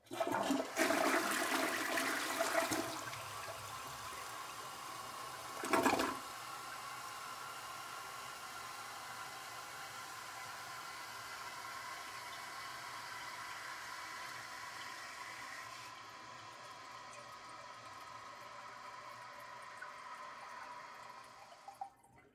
Flushing A Toilet
Flushing, effect, Toilet